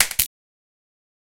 Menu sound 4
Sounds for a game menu.
Menu, Main-Menu, main, sound